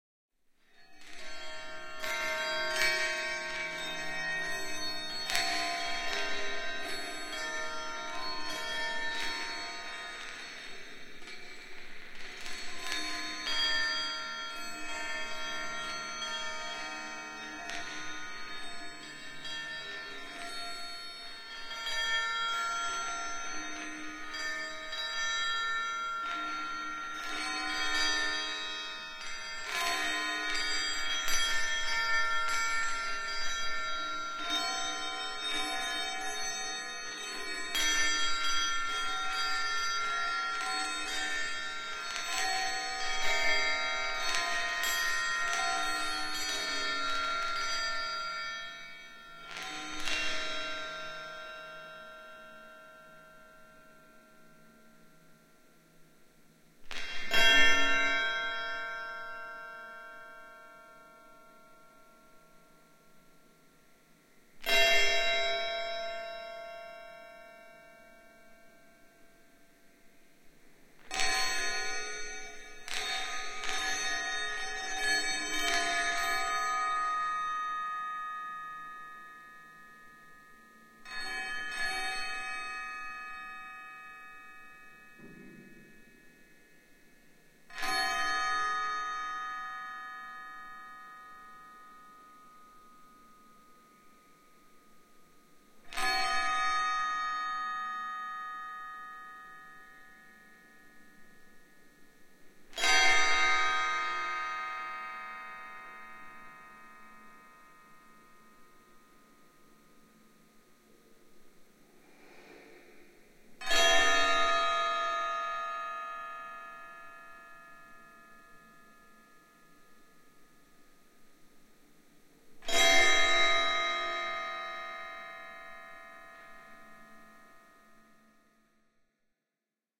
Chinese-Ball-Chime
Melodic & mechanical Sound of Chinese therapy spheres
windchime, clank, wind, chimes, ding, ting, clonk, ball, chime